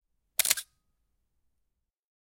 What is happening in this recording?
Take a picture with an analog Canon camera. Vintage design AE-1. Chunky sound
Recorded with Zoom H4N